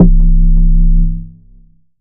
Basic triangle wave 2 C1
This sample is part of the "Basic triangle wave 1" sample pack. It is a
multisample to import into your favorite sampler. It is a really basic
triangle wave, but is some strange weirdness at the end of the samples
with a short tone of another pitch. In the sample pack there are 16
samples evenly spread across 5 octaves (C1 till C6). The note in the
sample name (C, E or G#) does indicate the pitch of the sound. The
sound was created with a Theremin emulation ensemble from the user
library of Reaktor. After that normalizing and fades were applied within Cubase SX.
basic-waveform, experimental, reaktor, triangle, multisample